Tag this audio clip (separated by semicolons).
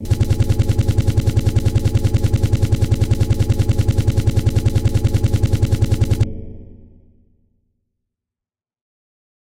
engine; V12; Ford; V8; Motor